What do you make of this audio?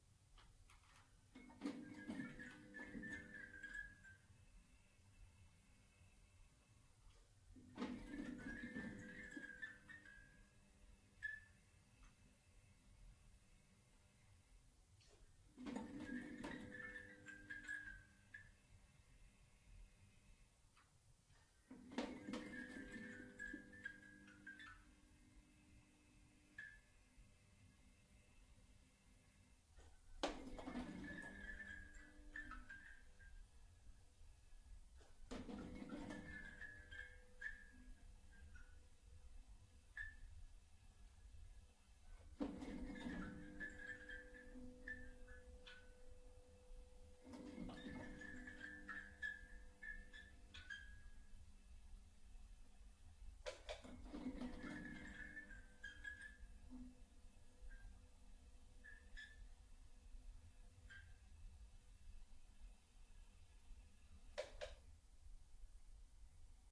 FXLM neon lights tv studio far switched on vari ssg denoise
A number of neon lights on the ceiling of a TV studio being switched on a couple of times. Sennheiser MKH416 into Zoom H6, slightly denoised in Izotope RX4.
XY stereo version also available.
horror, neon, lights, switched, shotgun